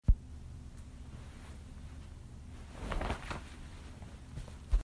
Clothing Ruffle 03-2
Foley of the soft rustling of some clothes.